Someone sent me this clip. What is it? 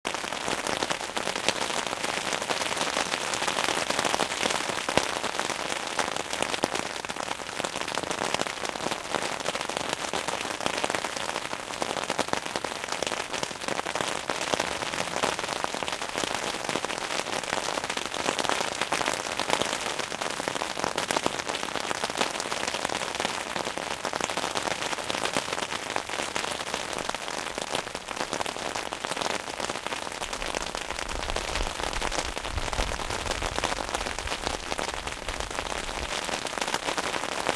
Rain is falling on an umbrella.